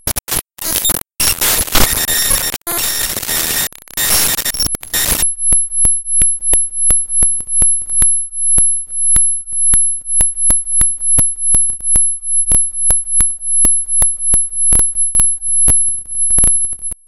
Raw import of a non-audio binary file made with Audacity in Ubuntu Studio